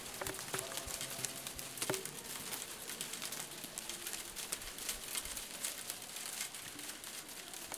SonicSnap JPPT5 Tree
Sounds recorded at Colégio João Paulo II school, Braga, Portugal.